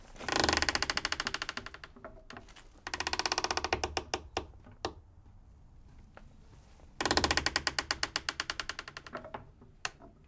Barn Door creek
Barn Door Opening and Closing
creeks, barn, door